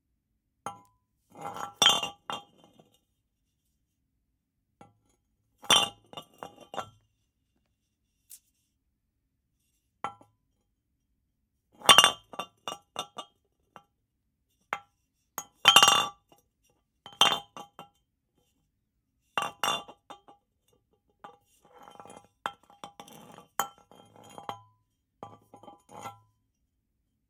A large wine bottle being dropped onto concrete and kicked around